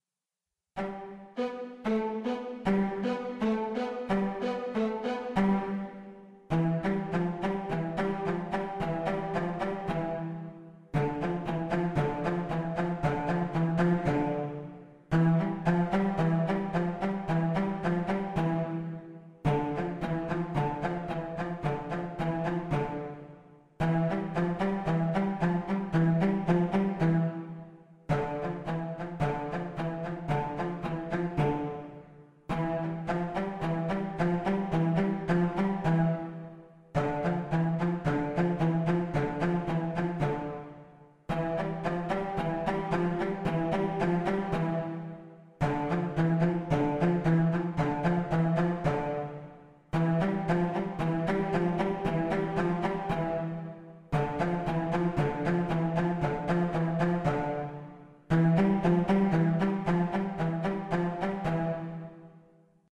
pan films=tiptoe
Some clips created for transition in a play. Originally for Peter Pan but maybe used for other plays.